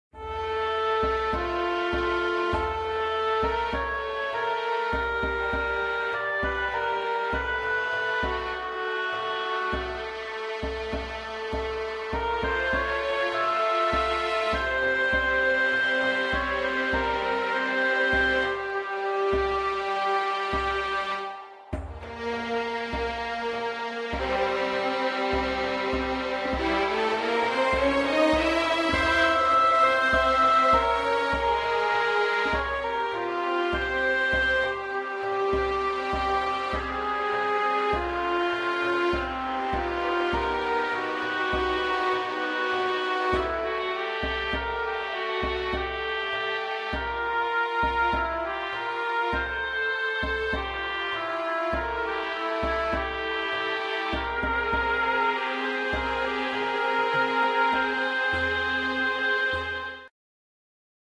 Desert Egypt sounding fantasy music.